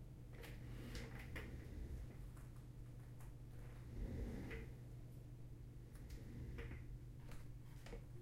An office chair rolling around. It's not possessed, I swear.